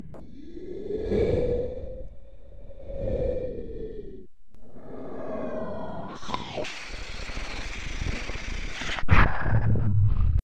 wind gurgle
Weird distorted voice. Kind of sounds like wind and gurgling. Recorded with a cheap Labtec LVA-8450 headset, processed in Audacity.
gurgle, distorted, wind, creepy, weird, processed, mouth